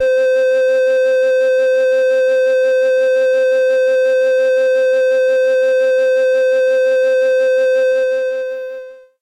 Fantasy C Hi Long
Part of my sampled Casio VL-Tone VL-1 collectionfantasy preset in high C long hold. Classic electronica of the Human League 'Dare' era
vl-tone, league, sample, human, fantasy, synth, vintage, casio, vl-1